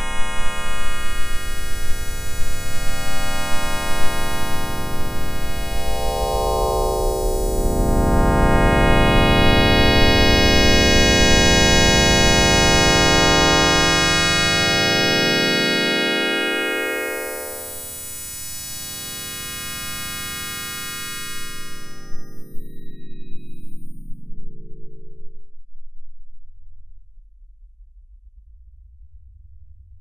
Unfa's Avatar 30s
Unfa becomes a victim of his own dare
and has his avatar picture 'sonified'.
This sound is really asking to be soaked in reverb...
ahhh... and the initial part of it reminds me of the teleport sound on the series Star Trek...
print-screen, dare-22, avatar, image-to-sound